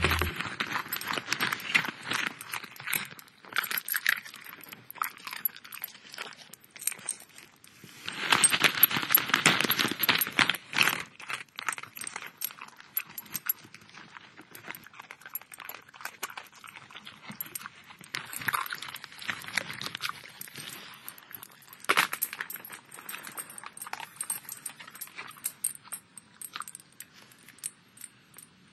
Chewing Dog Eats Crunchy Crackers
Recorded on a small Olympus device, set next to an open sleeve of stale saltines. You can hear her in the plastic packaging grabbing mouthfuls and chewing the crackers all up. She dips into the sleeve of crackers 3 or 4 times in this recording and smacks her chops after eating it all. Sorry about her collar chiming as dog's tags tend to, I just had to record that big mouthed crunch
I've removed the loud plastic rustling sounds, to focus more on the crunching sounds as she munches on snack crackers. Great for dog food or puppy chow commercials or for a film of a beast eating at charred crispy remains.
tooth, come, Lab, kibble, teeth, chew, mouth, snack, bite, biting, Whippet, dog, food, Comer, cracker, yum, bowl, pero, masticate, nosh, crunch, eating, retriever, eat, munch